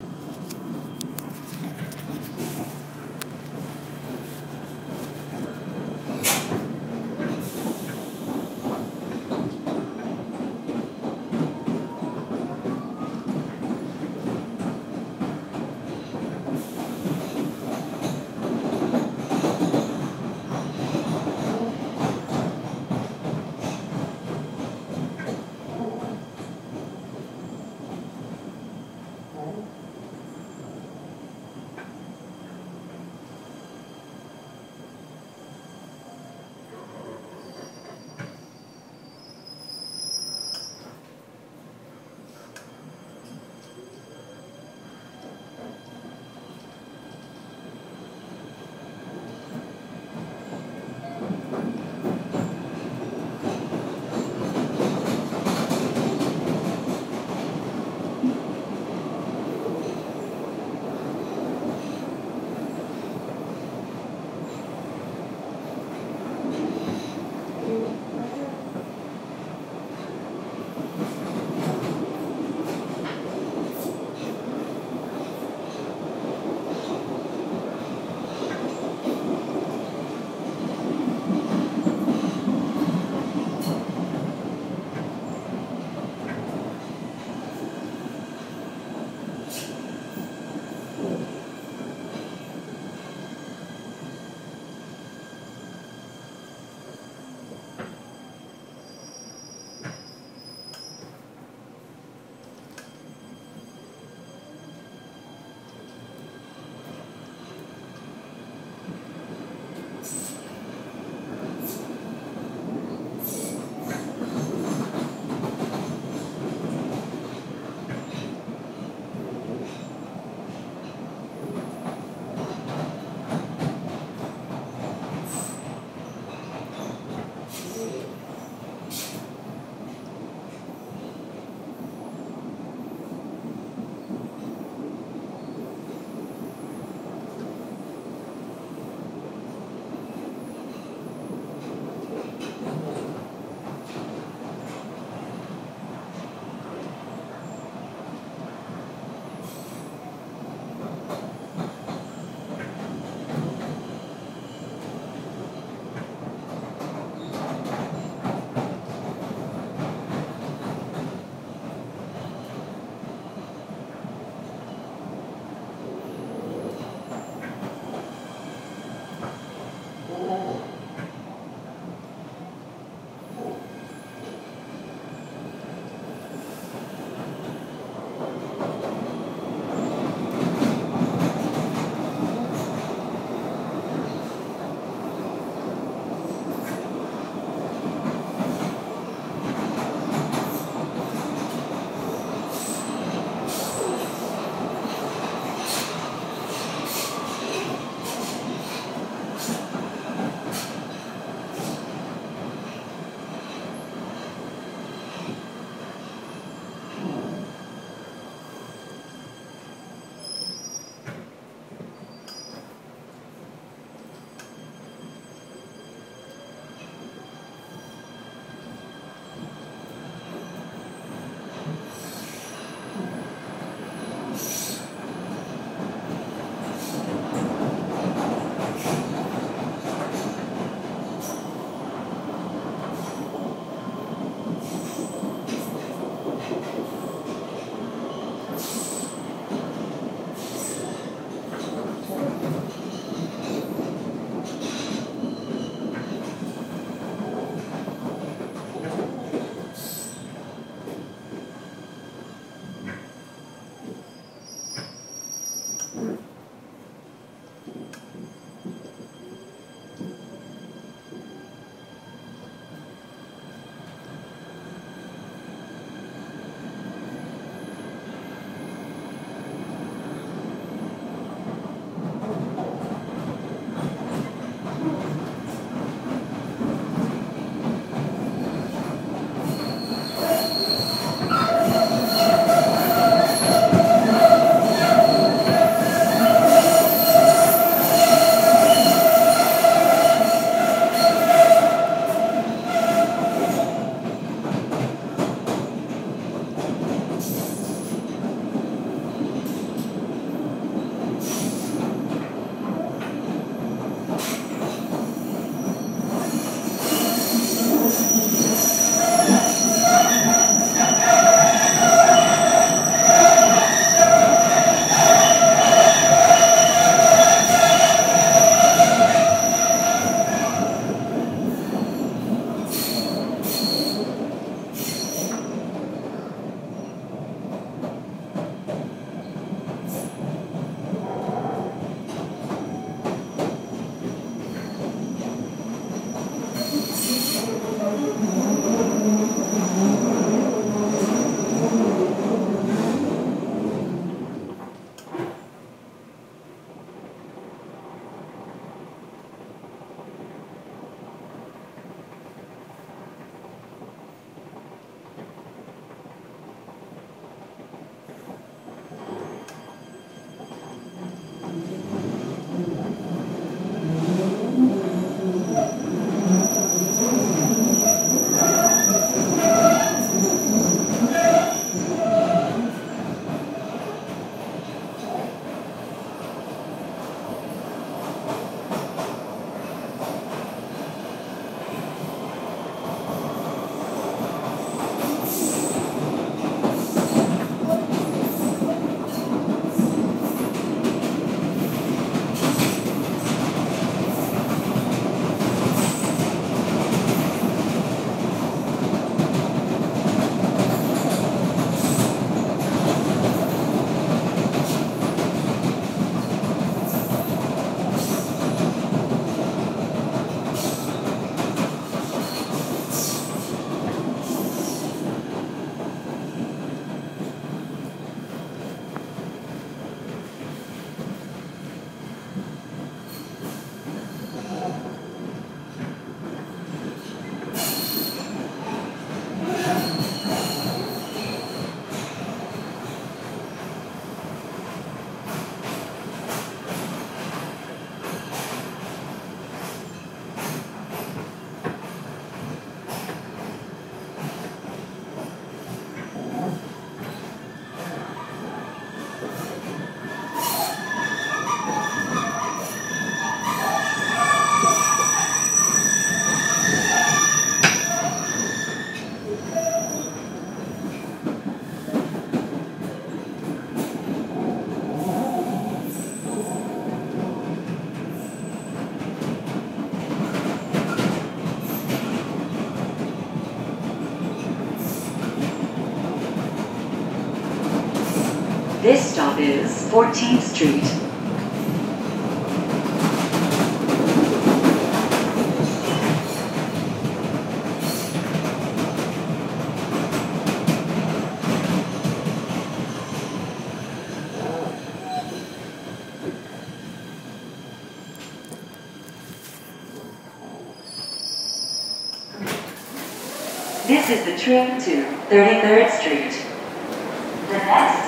PATH -> Union Square NYC Subway Train Ride
Subways in NYC don't usually get quiet. Somehow I found myself on a quiet train from New York to New Jersey, not one vocal, apart from the public service announcement.
Lots of what you'd expect from a train ride: squealing, screeching, rocking, and I got lucky as many stops and starts.
Taken at 44.1, with the FiRE app on an iPhone 4. Don't doubt those little guys.